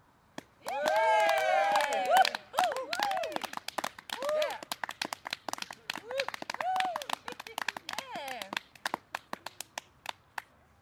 Group of people - Clapping and cheering - Outside
A group of people (+/- 7 persons) cheering and clapping - Exterior recording - Mono.
clapping, people, Group